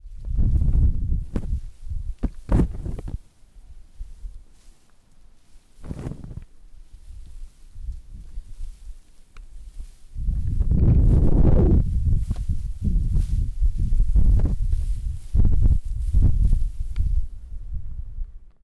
Viento Medio Hojas 1
Sound generated by the appearance of the air in outdoor. Hard intensity level.